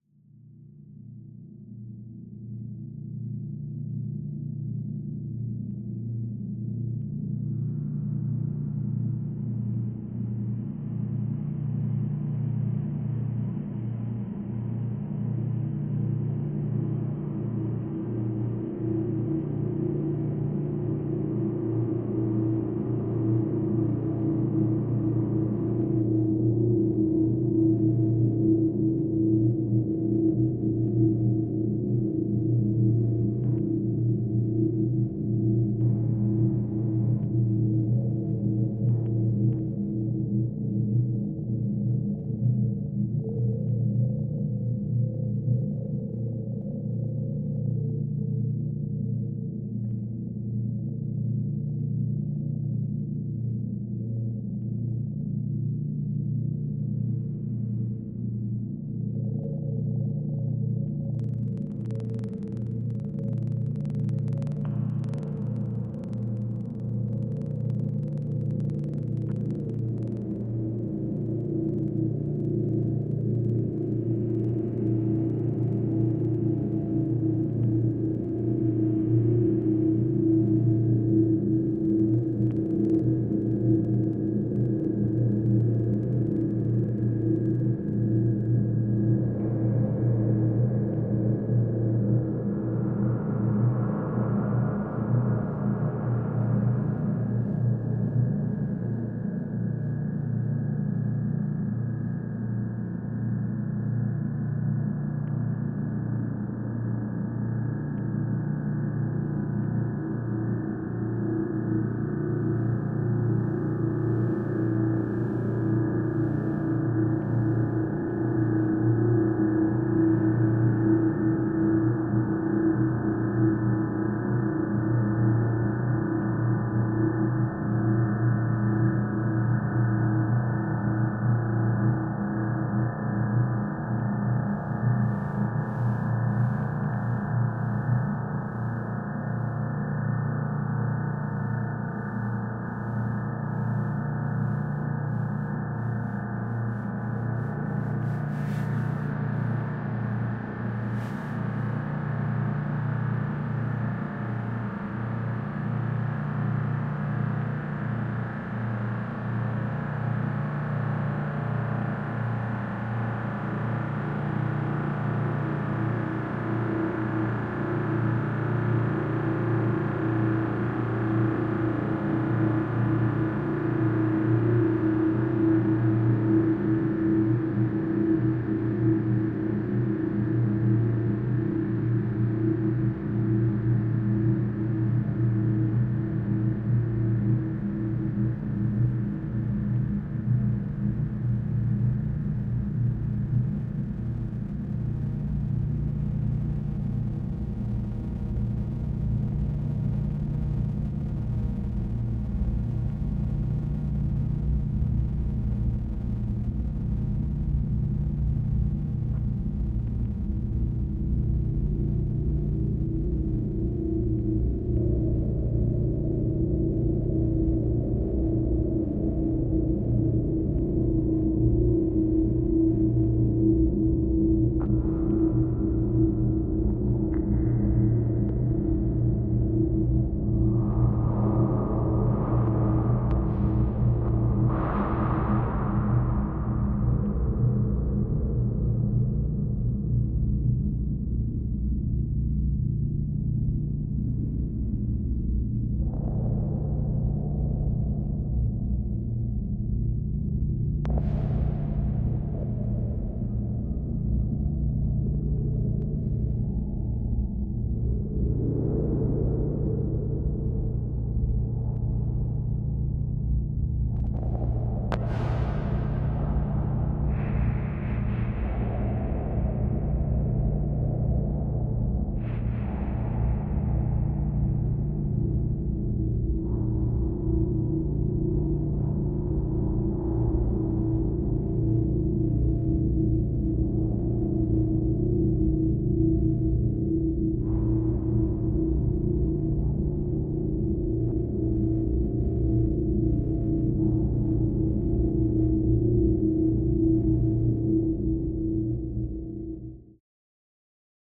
dark-ambient-atmosphere-low-end
"Dark" ambient style ambience
spaceship, atmosphere, void, radio, technology, alone, space, isolation